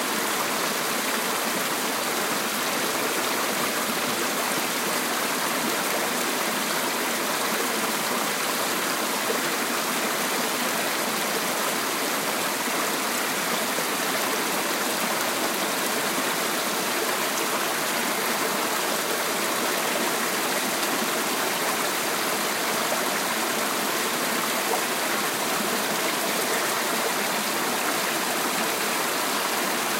Rio Homem, Peneda-Gerês National Park, Portugal - near Porta de Homem; In august a brook with cascades.
Recorded with an iPhone5S